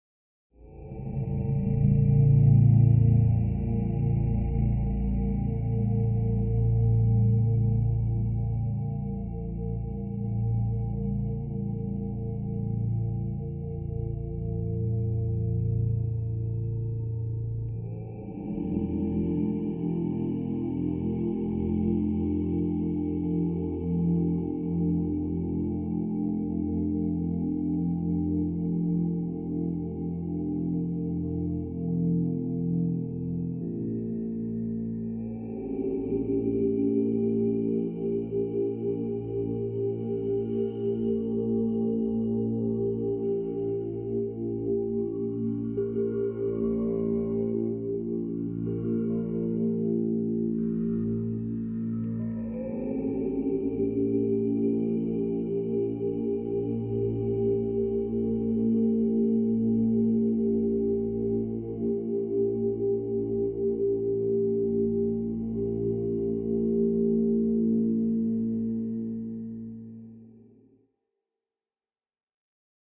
ohm singing cool2 cut2
Simple, single "Ohm" chant sample by my uncle, processed in Max/MSP (quite basic sample-player-, filterbank-patch) as experiments for an eight-speaker composition.